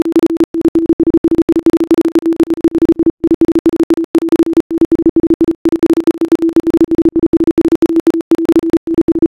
Volume oscillation3
A volume oscillation.
noise, synthesized